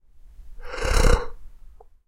A sore throat has its uses.
An example of how you might credit is by putting this in the description/credits:
The sound was recorded using a "H1 Zoom recorder" on 6th September 2017.